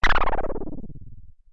Some strange sound from a strange and flexible patch I created on my Nord Modular synth.
digital, modular, nord, sound-design, strange, synthetic, weird